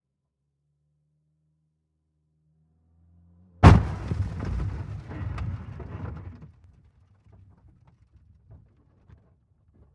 Car Crash INT
Actual interior recording, of an actual Peugeot 406 hitting an actual Ford KA.
Interior, aggressors perspective.
Sehnheiser MKH50 > Sound Devices 788t